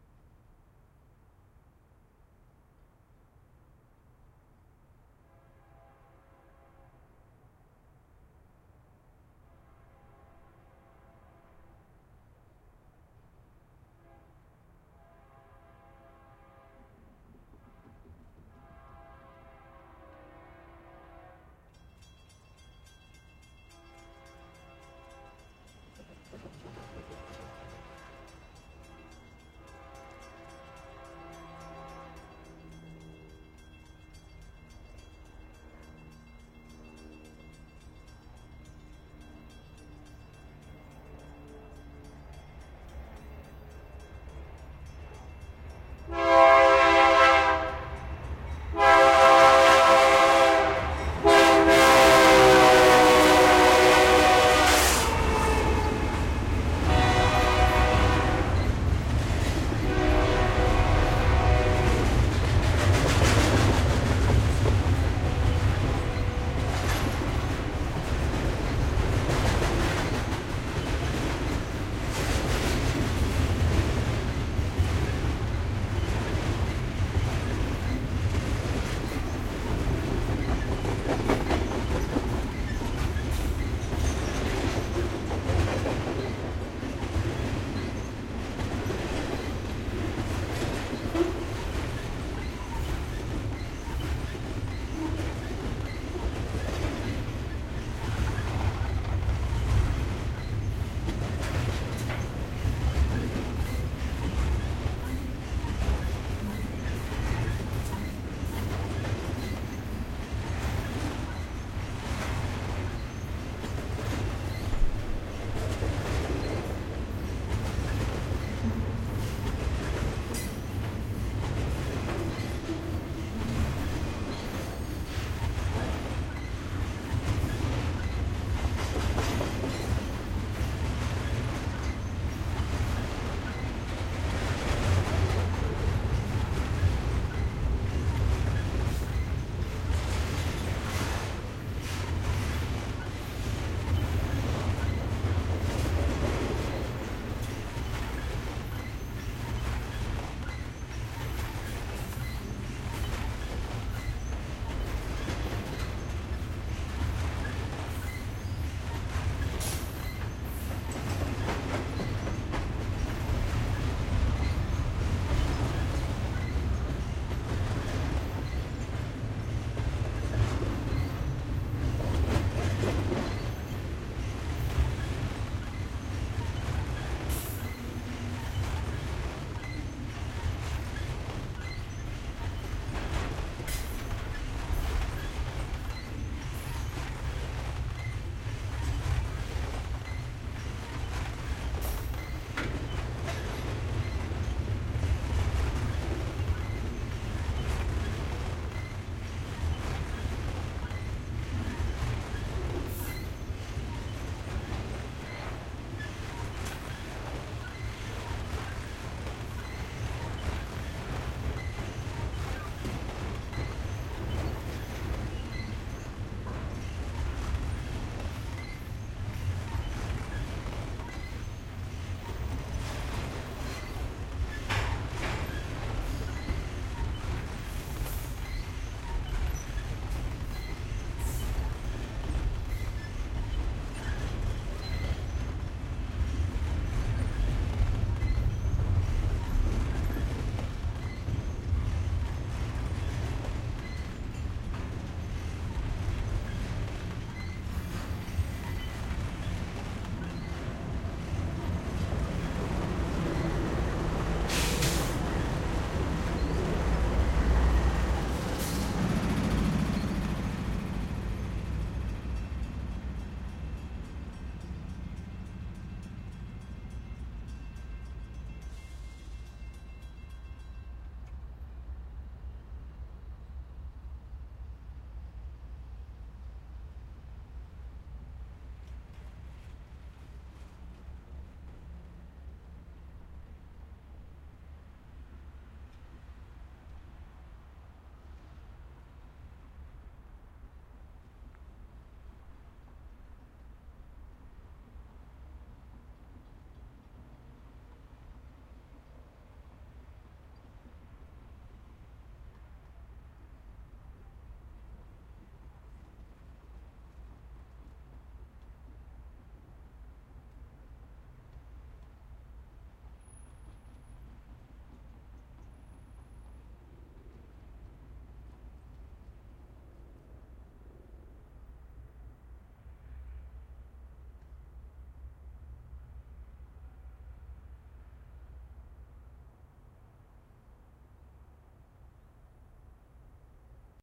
sample pack.
The three samples in this series were recorded simultaneously (from
approximately the same position) with three different standard stereo
microphone arrangements: mid-side (mixed into L-R), X-Y cardioid, and
with a Jecklin disk.
The 5'34" recordings capture a long freight train (with a helicopter
flying overhead) passing approximately 10 feet in front of the
microphones (from left to right) in Berkeley, California (USA) on
September 17, 2006.
This recording was made with a Rode NT4 X-Y stereo microphone (with
a Rycote "Windjammer") connected to a Marantz PMD-671 digital
airhorn, diesel, field-recording, freight, helicopter, horn, locomotive, nt4, railroad, rode, train, x-y, xy